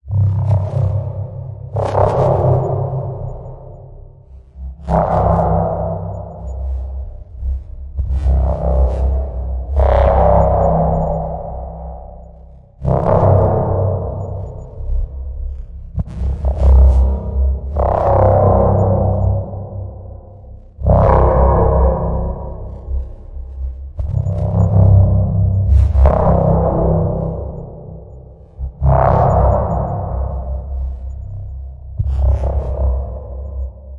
deep growl 1
Tweaked a preset and ended up with some of these. Might be useful to someone.
2 OSCs with some ring modulation ,distortion and reverb.
robot, sci-fi, alien, horror, synth